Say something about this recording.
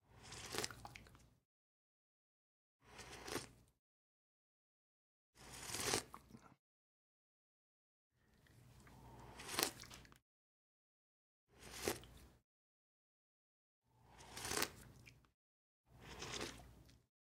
sips coffee various nice
nice, tea, liquid, sips, coffee, various